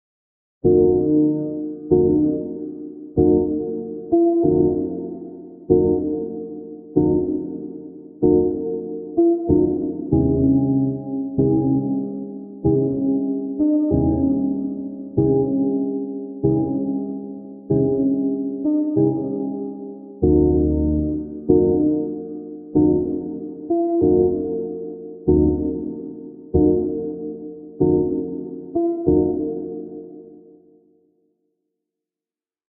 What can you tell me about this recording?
Piano (Resonance/Room)
A piano part completely played & synthesized by myself with the Poizone plugin. It got a large room, exciter and some stuff on it.
<95 Bpm